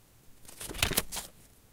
Page Turn 1
Quick turn of a page in a small, spiral notebook.
Now go read a good book.... like the bible. Jesus is alive!
page, paper, turn